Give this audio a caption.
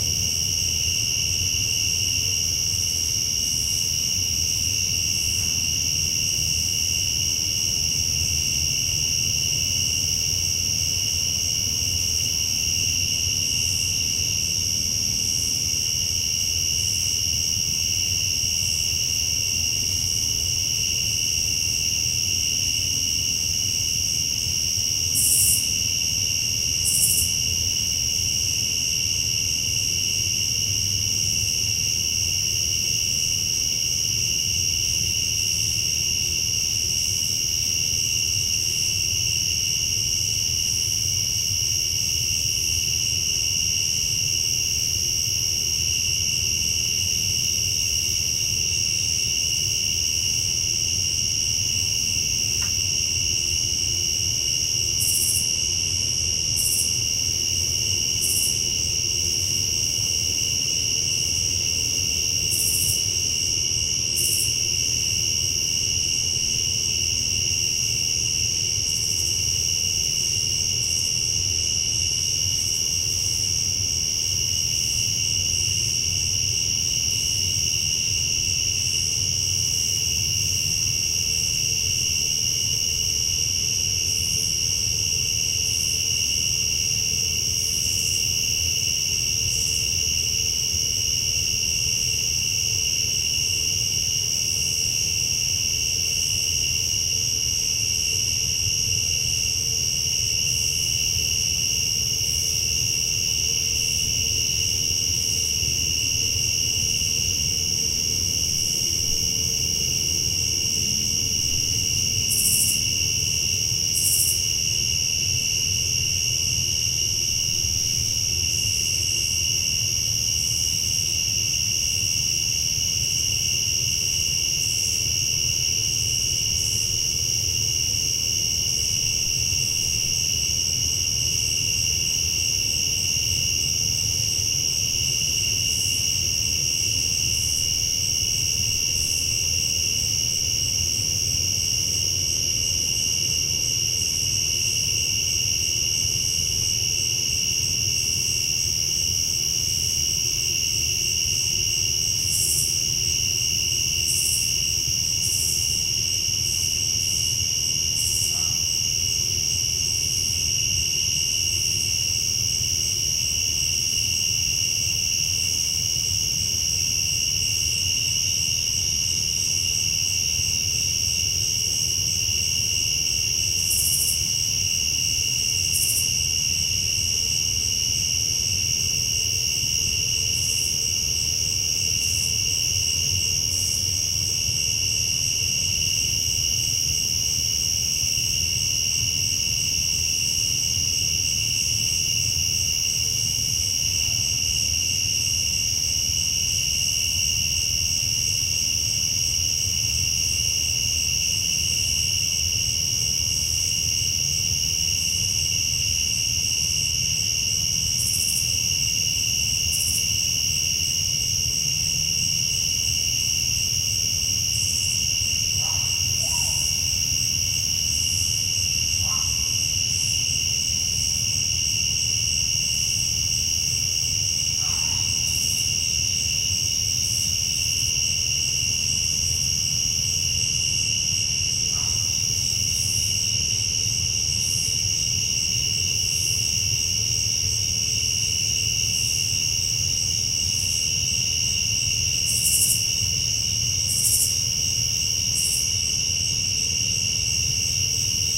The sound of a suburban summer night in Cincinnati, Ohio where you can hear crickets (rather loud) with some faint hum of traffic off in the distance.
ADPP, ambience, cityscape, crickets, dark, evening, field, general-noise, night, recording, suburbs, summer